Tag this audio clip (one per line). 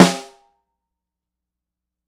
shot
unlayered
drum
Snare
sm-57